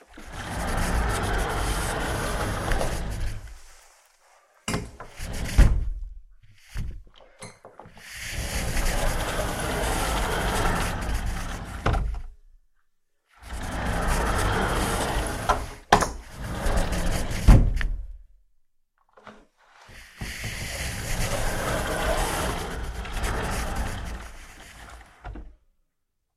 20180225 Sliding door
door, recording